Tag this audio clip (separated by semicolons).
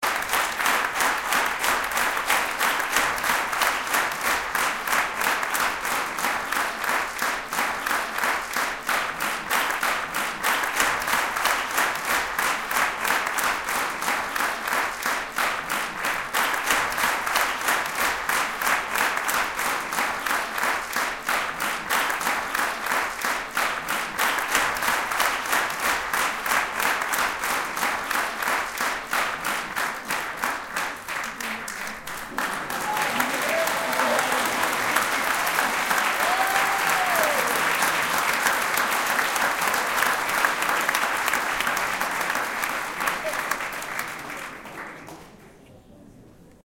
applaudissements; applause; meeting; public; publique; reunion